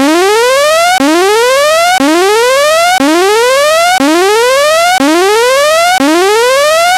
Cyril Voignier 2013 Alarm
Sound generate with audacity.
- generate tone
- pitch +46
- Hight Pass Filter 1000Hz 6db
- repeat
- reverse the second part
alarm, shot, synthetic